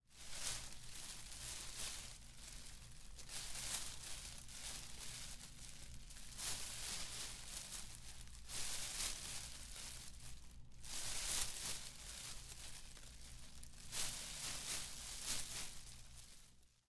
35 vegetcion full
moving through grass and leaves
air, movement, nature